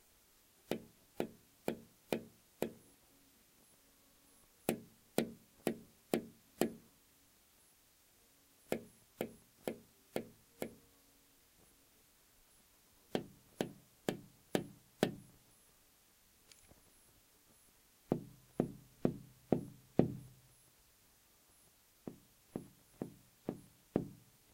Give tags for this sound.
120; bang; bpm; ding; fingernail; glass; large; pen; tap; window

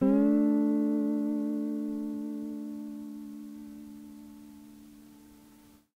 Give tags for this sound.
collab-2; guitar; Jordan-Mills; lo-fi; lofi; mojomills; slide; tape; vintage

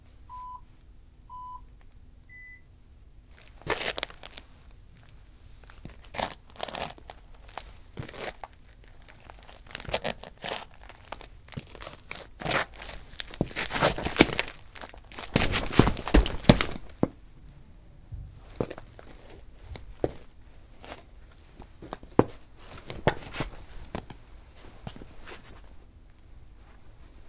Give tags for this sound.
foley; footstep; footsteps; dirty; 3-beep; walk; grit; dirt; human; tile; crunchy; rustle; beep

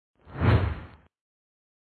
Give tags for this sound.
motion; wispy; whoosh; moving; move; high; swish; attack; long; swing; whip; light; swoosh; woosh

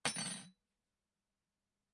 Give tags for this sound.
cutlery; dishes; Falling; fork; Hard; Hit; hits; Knife; knive; spoon

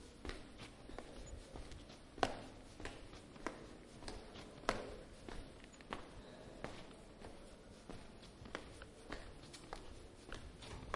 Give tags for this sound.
floor; steps; vinyl

